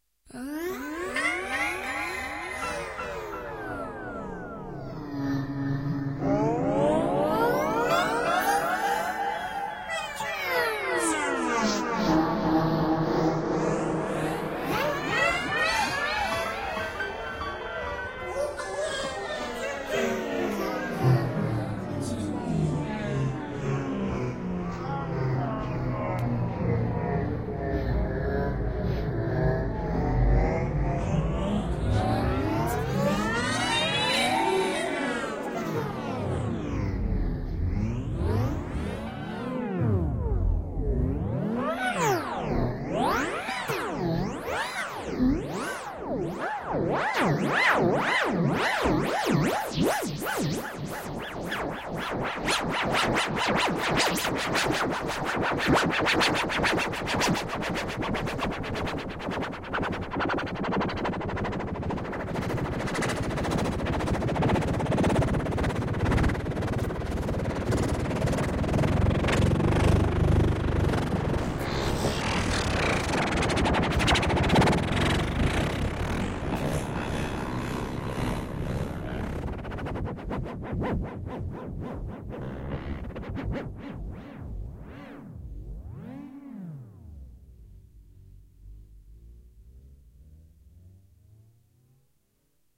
Oscillating Malfunction
Oscillating female voice, made in Fruityloops.